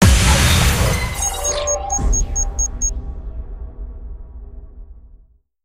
Radio Imaging Element
Sound Design Studio for Animation, GroundBIRD, Sheffield.
radio, splitter, imaging, wipe